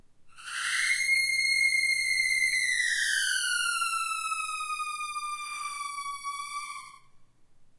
squeak-toy-scream ps01
A snippet from one of my squeak toy sounds, paulstretched in Audacity. Sounds like one of those screams heard in horror movies.
scary, scream, horror, creepy, paulstretch